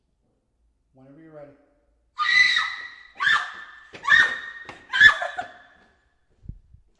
girl scream frank 6
scary, scream, horror, screaming, girl, screams, woman